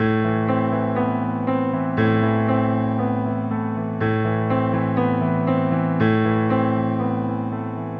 dark piano-accomplisment with left hand, to replace bass or use as intro.